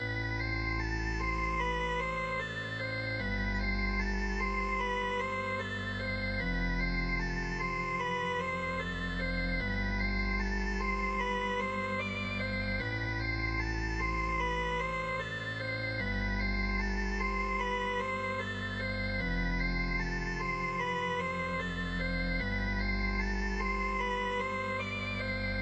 Hypo-Lead-150bpm
Lovely, fluent organ-like synth loop.
Useful as main or background synth-loop.
FL Studio - Harmor
11. 12. 2015.
150bpm, beat, break, breakbeat, dnb, dub, dubstep, floating, liquid, long, loop, low, step, synth